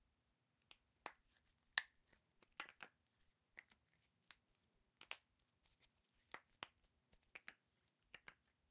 Extended knuckle cracking session.